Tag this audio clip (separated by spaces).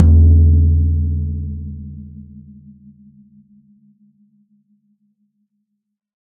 drum velocity multisample tom 1-shot